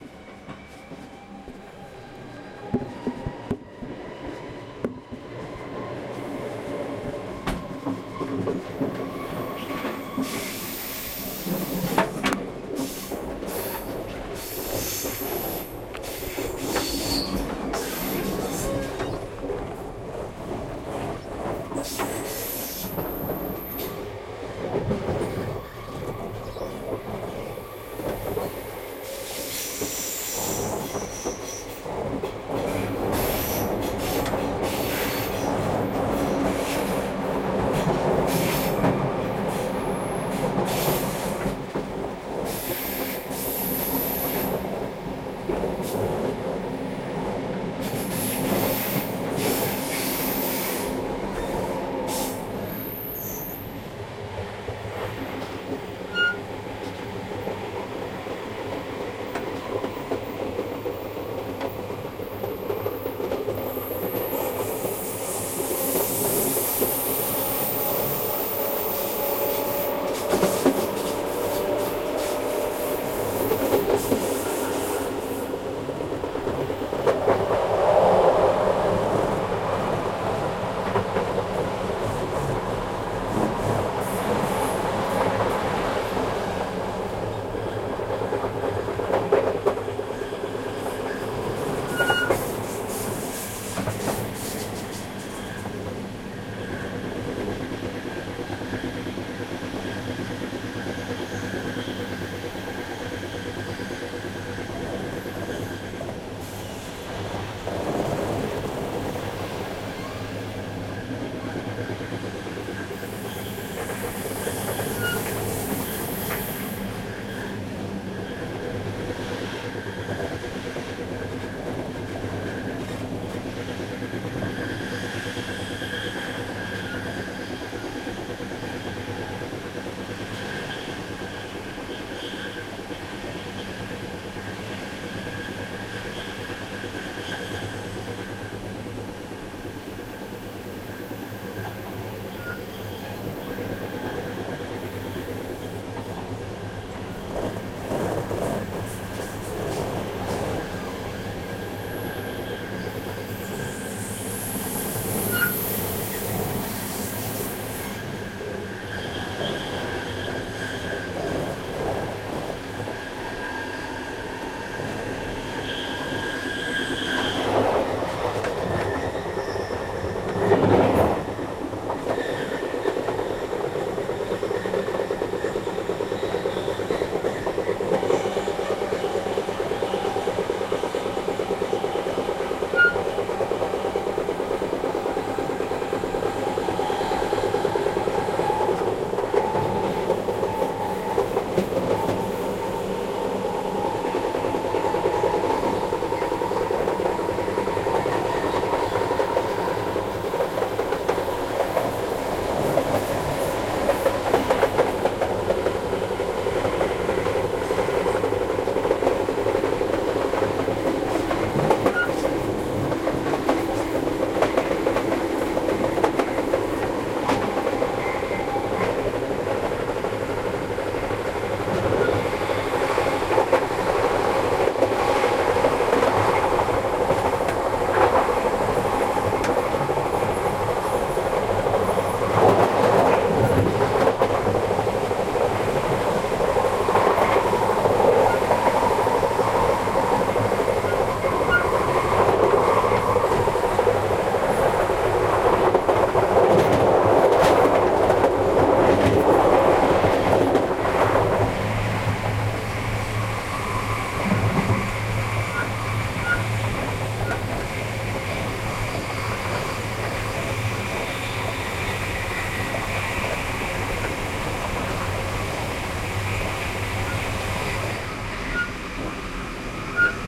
between two train carriages, recorded with the xy microphone of the zoom h2n